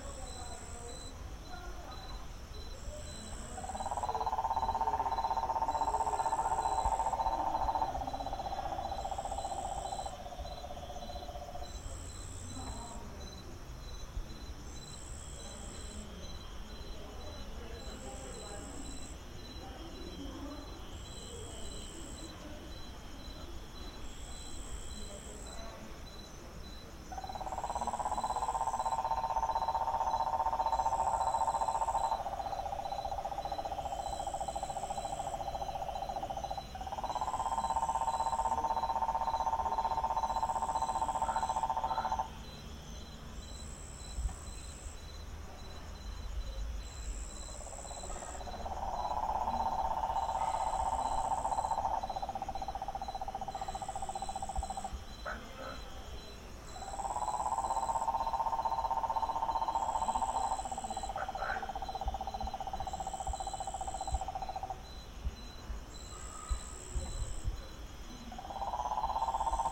Cicadas and frogs - Antioquia Suroccidental - Bolombolo Colombia
Cicadas and frogs singing at night on a hot weather evening. Chirps and ambience from the surrounding insects and a slow rolling song from the frogs in the pond.
antioquia, cicadas, colombia, crickets, field-recording, frogs, heat, hot, night, nighttime, pond, summer, Tropical